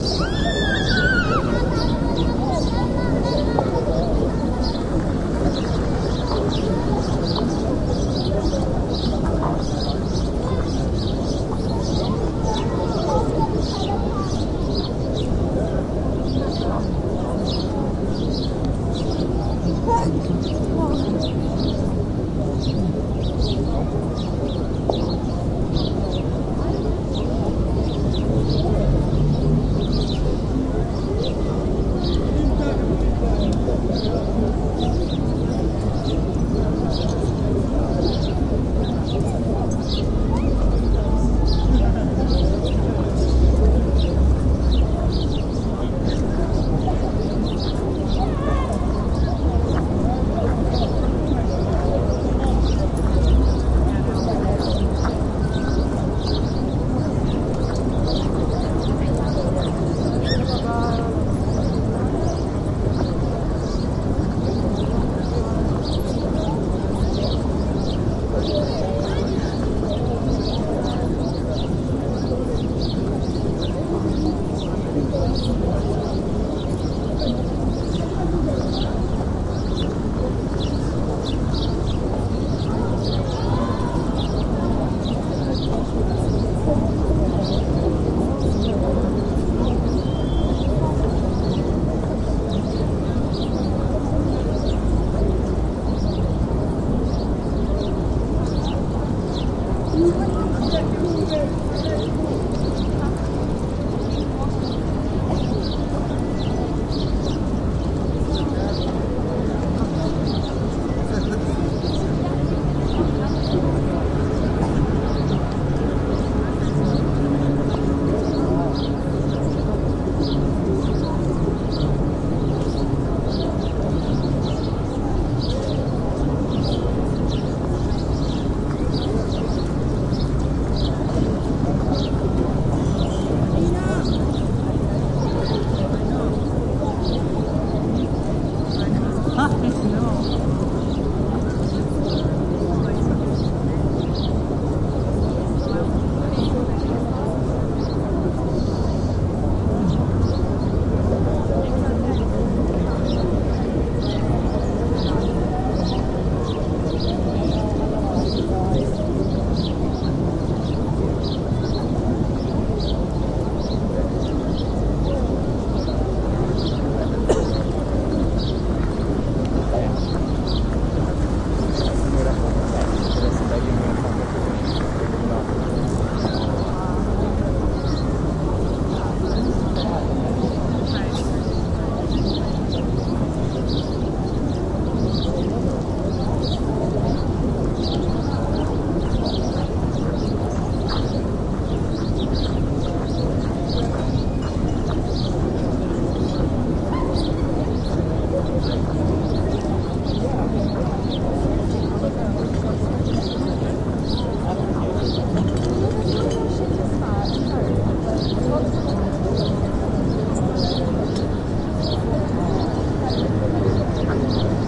Urban Park Loop
Sitting in Görlitzer Park in Kreuzberg, Berlin.
Recorded with Zoom H2. Edited with Audacity.
city, grass, green, park, people, talking, urban